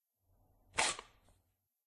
Fast Paper Ripping 2
Fast ripping sound of some paper.
tearing, tear, ripping, paper, rip, fast